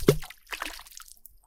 A rock thrown to a lake.